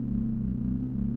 sound of my yamaha CS40M
fx, sample, sound, synthesiser